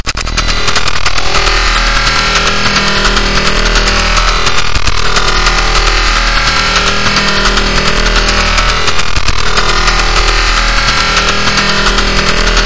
American artillery radar Scunkwork Rr2020
american, artillery